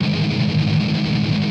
dis muted G# guitar
Recording of muted strumming on power chord G#. On a les paul set to bridge pickup in drop D tuneing. With intended distortion. Recorded with Edirol DA2496 with Hi-z input.
160bpm, distortion, drop-d, g, guitar, les-paul, loop, muted, power-chord, strumming